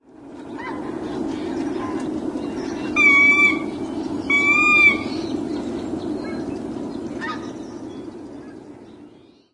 ag23jan2011t20
Recorded January 23rd, 2011, just after sunset.